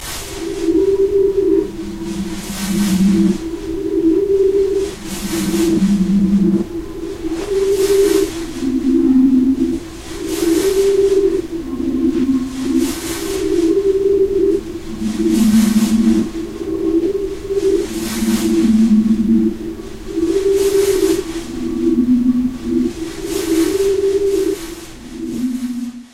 Shadow Maker-Bathroom
What will you find in the Bathroom?
What you hear is the sound of an old mystic Engine, or something else, i don´t know. I made it with Audacity. Use it if you want, you don´t have to ask me to. But i would be nice if you tell me, That you used it in something.